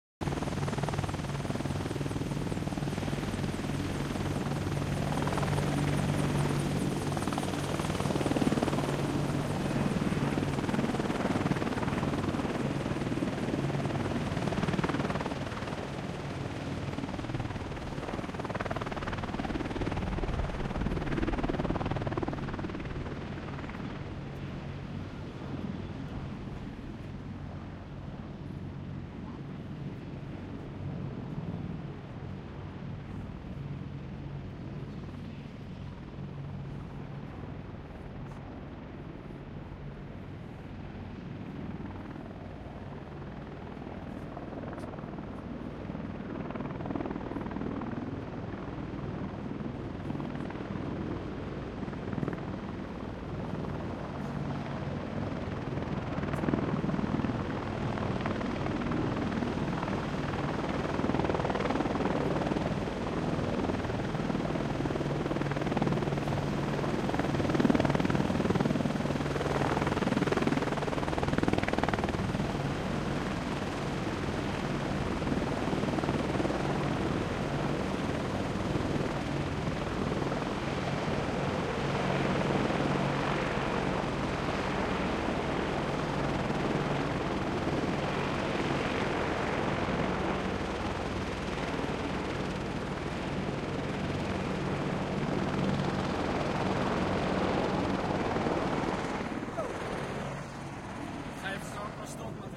2 Apache Helicopters driving to runway. Recorded with a Aaton Cantar-X , and a Neumann 191i.
It is basically set noise ( used for smoothing the dialogue-background recorded on the same place ) and a bit short :)
apache, ground, helicopter